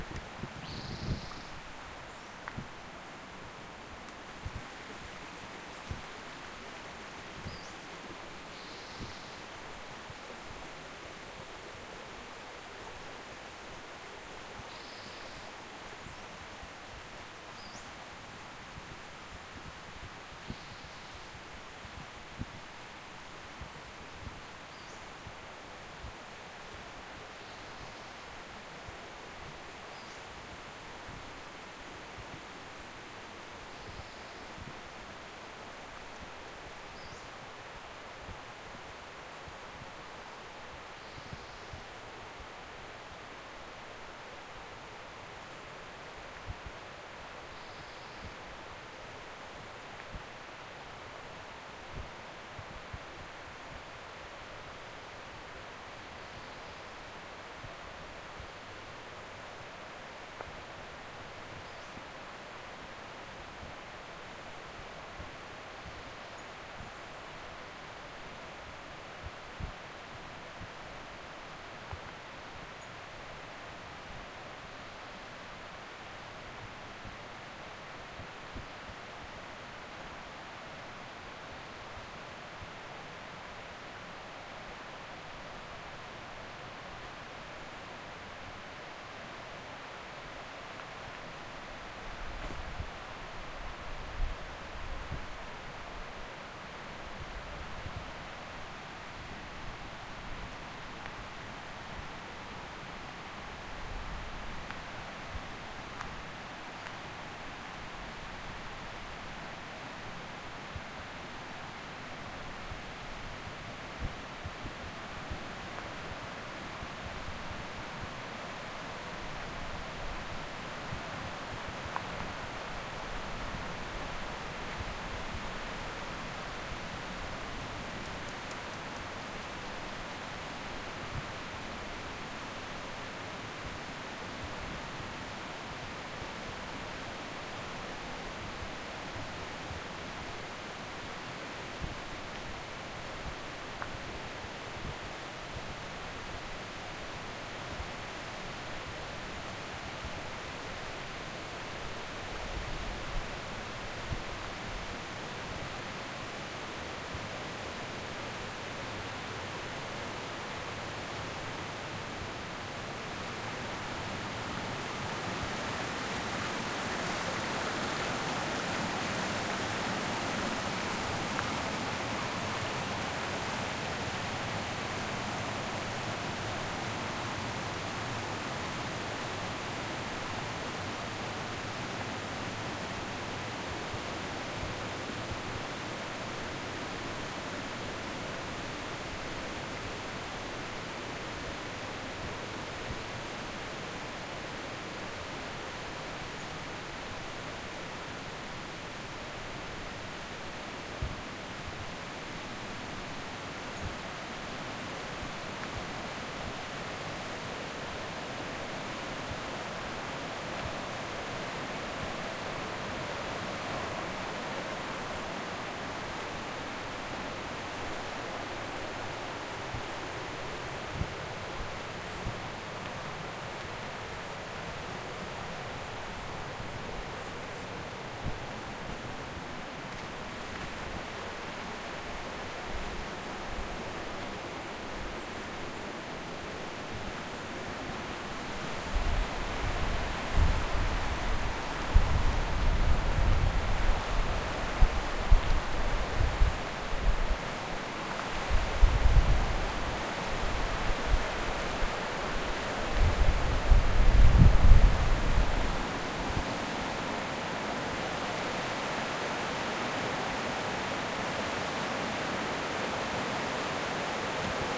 Park Walk
Walking with my Zoom H4n between a river and a stream on a Spring morning in June.
birds, field-recording, walk, river, forest, nature